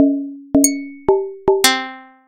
3sujkowski cim2010
For this song i have generated a "Pluck" sound with the software Audacity, and a "Risset Drum" sound, and i've changed the speed. Next i have copy the sound and mixed the songs together in order to get a short sound like if it was played on a instrument.